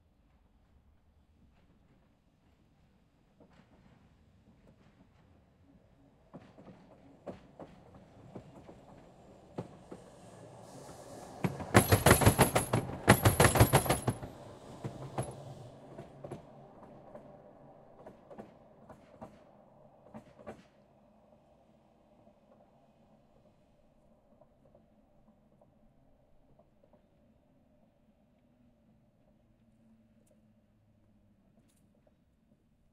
tram crossing railroad rails 1
Tram crossing railroad rails with loud rumble.
Recorded: 2012-10-13.
rumble, tram, railroad, crossroad, noise, rails, streetcar